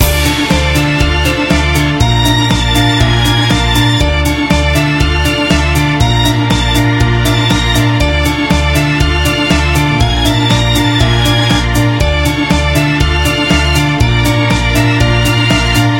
Loop Little Big Adventure 06
A music loop to be used in fast paced games with tons of action for creating an adrenaline rush and somewhat adaptive musical experience.
war, music, battle, music-loop, game, gamedev, videogames, videogame, loop, victory, indiegamedev, gaming, games, indiedev, Video-Game, gamedeveloping